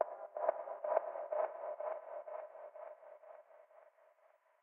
cave taps
Scary sounding snare echoing in the depths of a cavern.
snare, haunting, echo, scary, cave, reverb